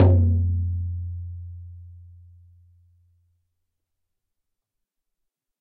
Shaman Hand Frame Drum 04 02
Shaman Hand Frame Drum
Studio Recording
Rode NT1000
AKG C1000s
Clock Audio C 009E-RF Boundary Microphone
Reaper DAW